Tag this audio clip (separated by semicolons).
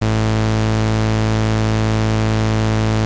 bass; noise